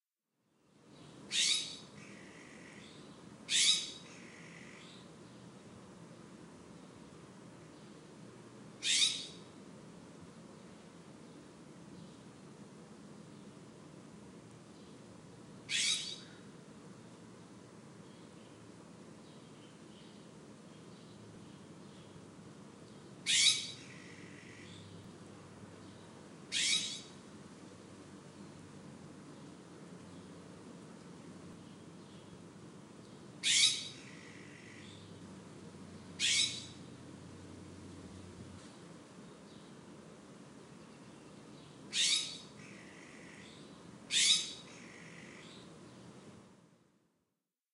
Two jays calling to each other in the trees in my yard. Includes ambient hiss. May be scrub jays.
tweets, bluejay, jays, jay, bluejays, spring, nature, blue-jay, chirping, birds, bird, birdsong, birdsongs, birds-chirping